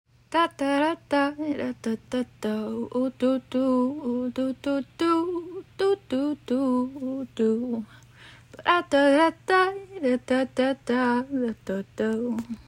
feminine voice freestyle scatting melody
female, girl, scat, singing, vocal, woman, human, melody, voice, sing, beat